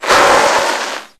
bike-skid
A montain bike breaking on concrete. Recorded with a Pentax K5 and an Audio Technica PRO 24-CM stereo microphone at 16bit/32kHz. Slightly edited in Audacity in order to reduce major peaks (by hand, no plugin) and to improve the headroom.